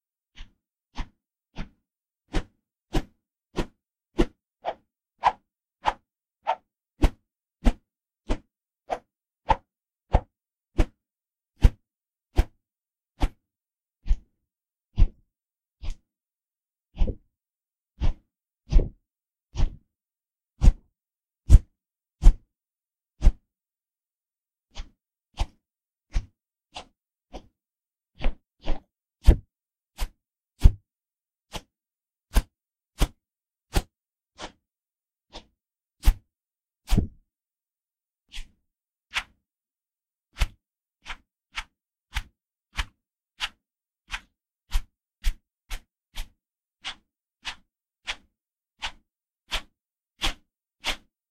swooshes and swishes
me swinging various objects in front of my microphone to get loud swooshes. recorded at my desk, slightly edited to remove background noise
foley, swish, swoosh, swooshes, weapon, woosh